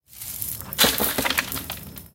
Escombros caen por explosion
debris foley sound
foley, rocks, explosion